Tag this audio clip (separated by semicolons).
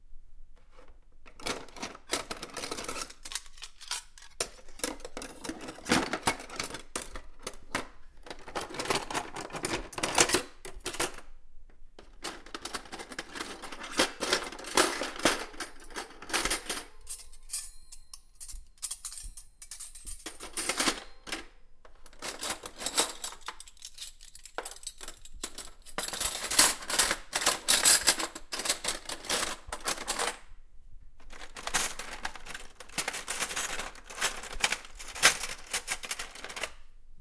metallic; silverware